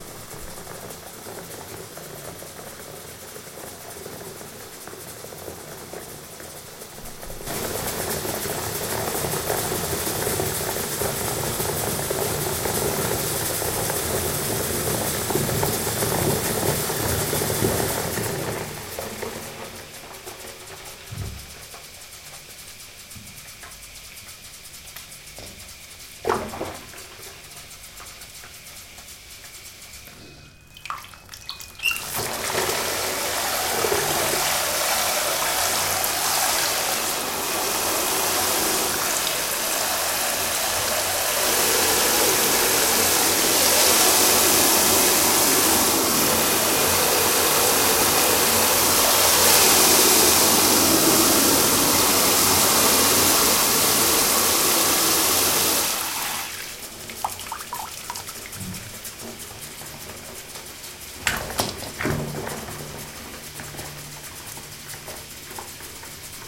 I let water inside my bathtub. For that I use the shower head and the tap. This recording was made with a Zoom H2.